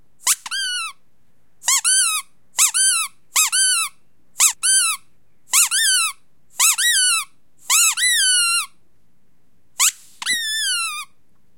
squeak-toy-squeeze full01
A rubber squeaky toy being squeezed. Recorded with a Zoom H4n portable recorder.
squeezy, squeak, toy, squishy, squeeze, squeaky